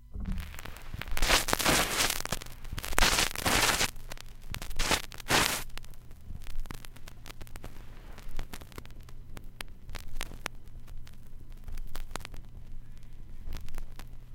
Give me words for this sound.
Snippets of digitized vinyl records recorded via USB. Those with IR in the names are or contain impulse response. Some may need editing or may not if you are experimenting. Some are looped some are not. All are taken from unofficial vintage vinyl at least as old as the early 1980's and beyond.
album, lofi, retro